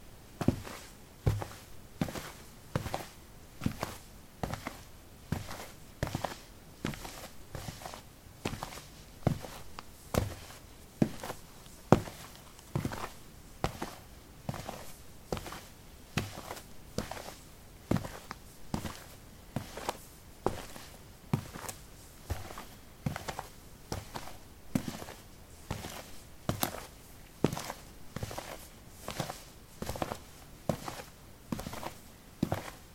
soil 13a sportshoes walk
Walking on soil: sport shoes. Recorded with a ZOOM H2 in a basement of a house: a wooden container placed on a carpet filled with soil. Normalized with Audacity.
footstep, footsteps, step, steps, walk, walking